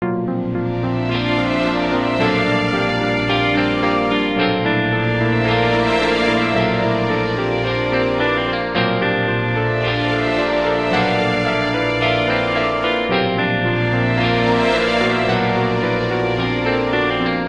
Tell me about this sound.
GarageBand Short Music
A little song I made in GarageBand on my ipod. Thought since my first one got a decent amount of downloads Id try and make more short songs.
GarageBand, Music, Short